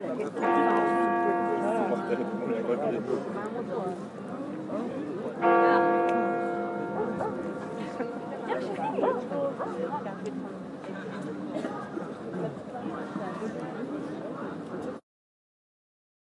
Church Bells In Amsterdam 02
Recorded in Amsterdam December 2013 with a Zoom H4N.
Winter-Time, Chimes, Church, Bells, Amsterdam, Christmas, Bell